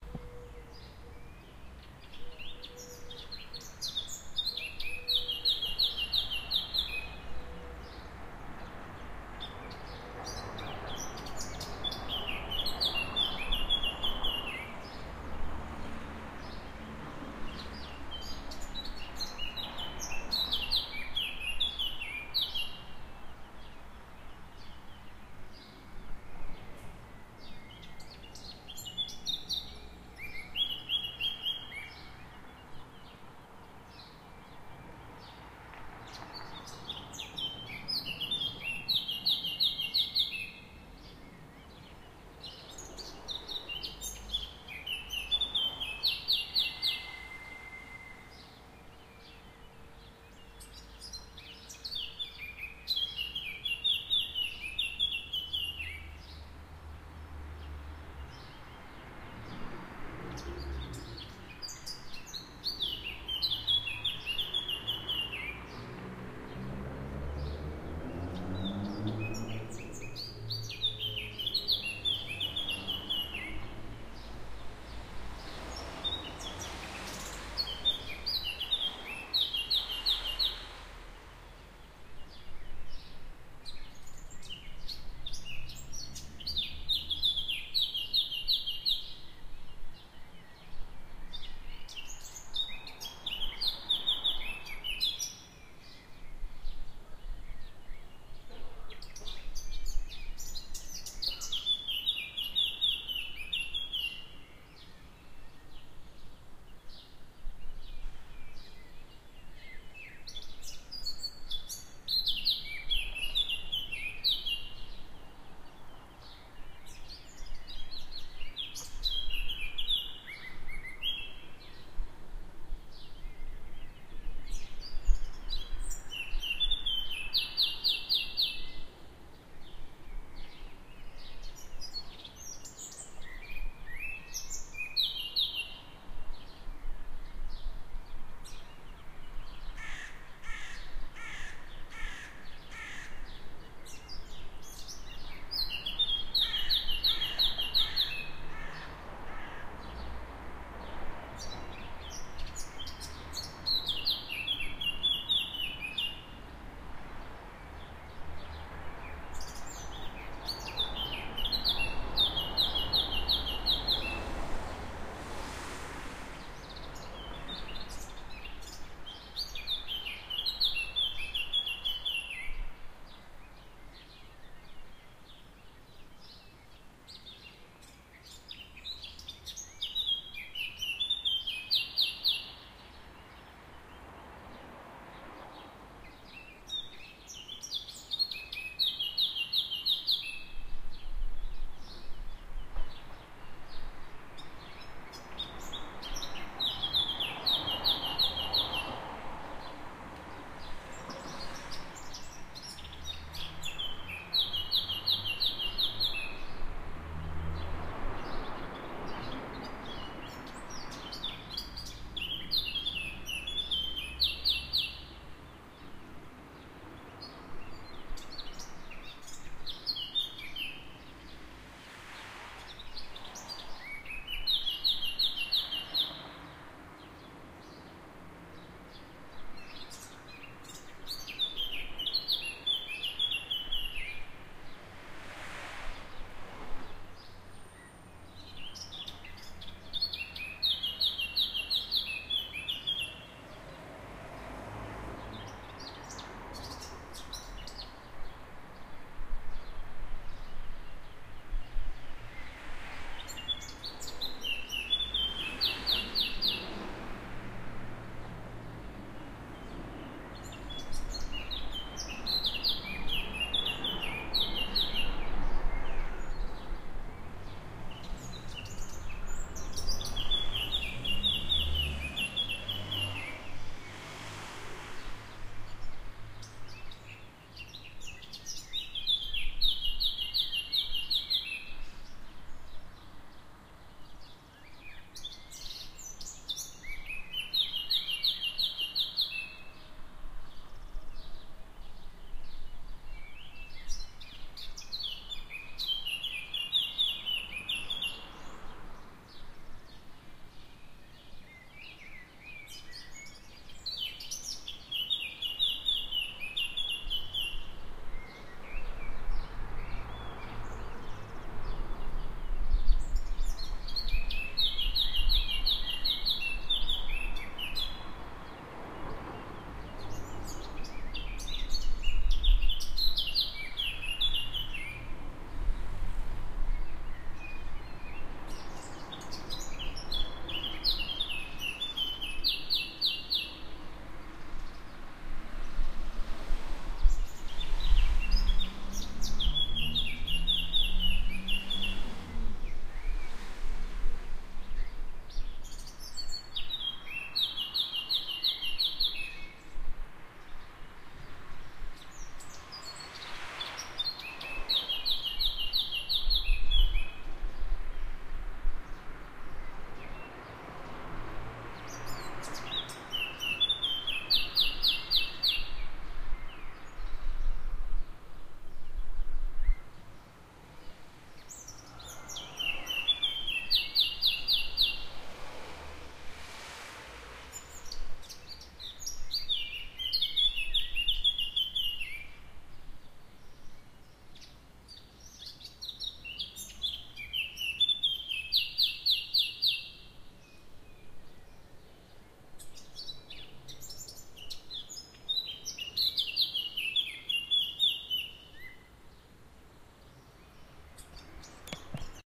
Birdsong at Dawn, Lucca
Dawn birdsong recorded in Lucca, Italy, June 2016, 5 am from a fourth floor window in the suburb os Sant'Anna. Loud soloist of unidentified species, possibly Eurasian blackcap (Sylvia atricapilla) on nearby treetop. Other birdsong and traffic sounds in background. Recorded with Zoom H4n's built-in stereo mic.
traffic, birds, city, field-recording, morning